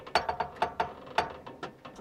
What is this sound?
Metal crackling
Crunchy metal sound from the piece of a tractor.
Zoom H4n + shotgun mic